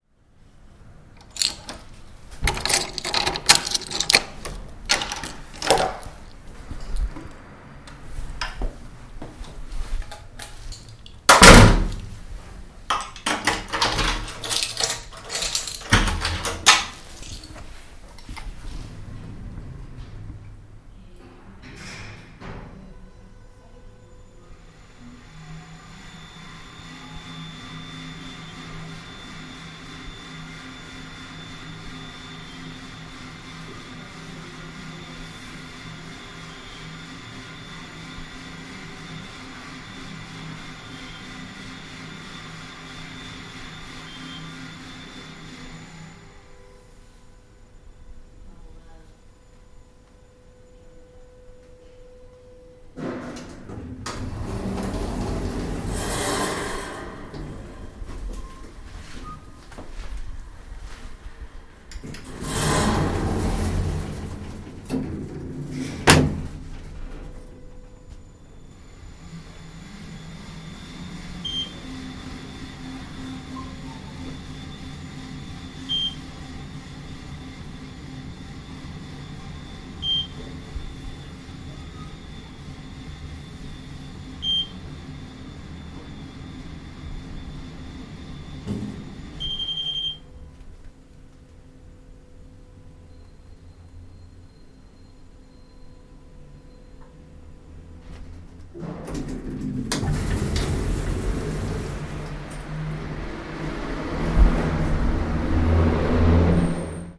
subjetive transit from apartment door to elevator to lobby.